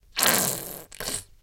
the sound, that makes a half filled and wet plastic showergel bottle